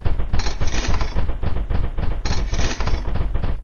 Machine loop
My One Shot Samples were created using Various input sources and sampled with my Yamaha PSR463 Synthesizer. I try to keep all my one-shot samples 2 seconds or less as the sequencer and drum software performs best with samples this size.
Check out my latest music on the new Traxis Rumble Channel
BASS, Computer, DEEP, Drum, Dub, Effect, FAT, FX, Glitch, loop, machine, One, ONE-SHOT, Percussion, sample, Sequence, Shot, Sound, Special, Synth, Synthesizer, vocal